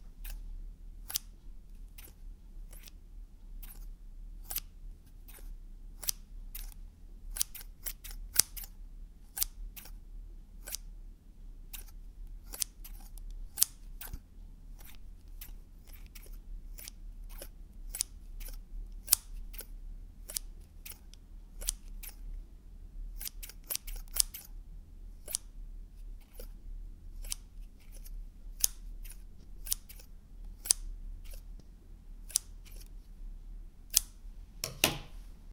Several scissor cuts
cutting, Scissors, slice, cut